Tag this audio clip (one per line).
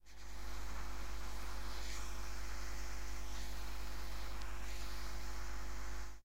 marker
line